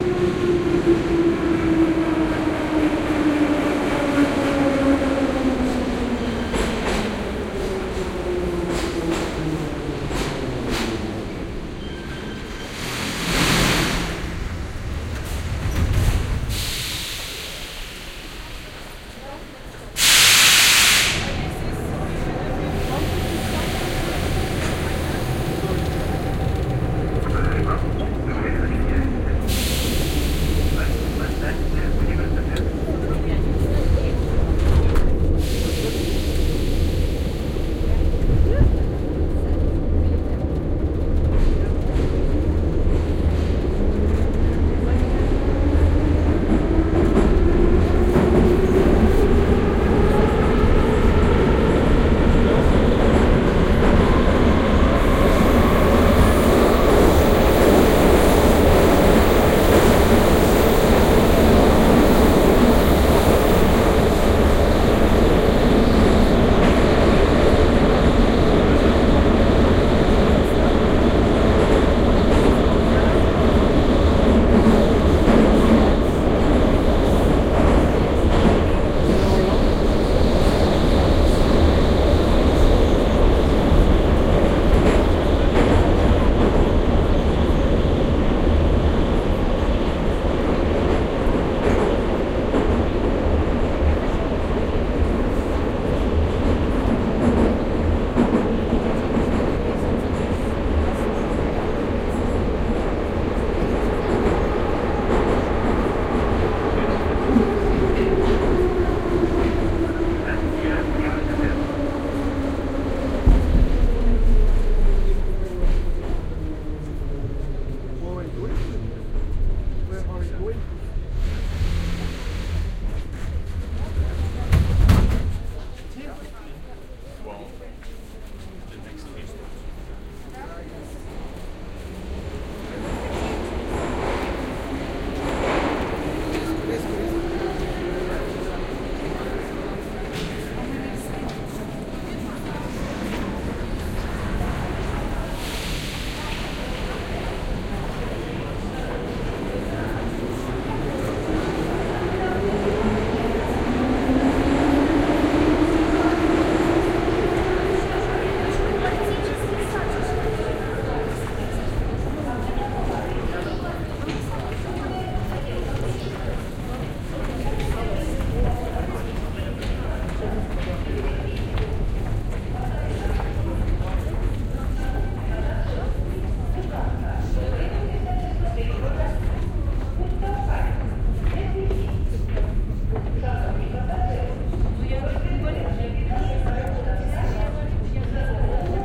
07-kiev-metro
Taking a ride on the metro in Kiev, Ucraine. You can clearly hear the metro stopping, we're getting in, then the recording continues from inside. It was difficult to keep my head still, so the stereo image shifts a bit.
field-recording, metro